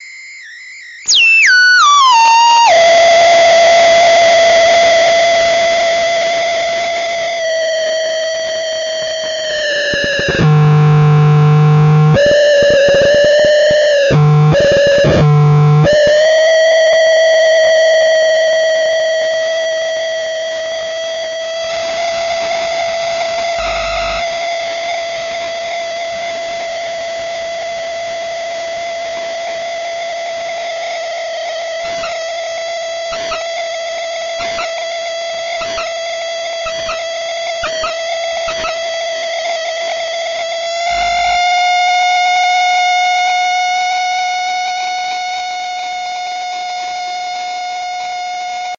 circuit bending baby radio fm